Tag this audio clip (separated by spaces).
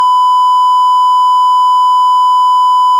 1kHz effect fx loopable sfx sine sine-wave triangle triangle-wave wave